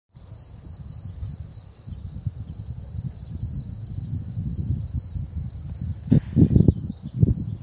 crickets in a field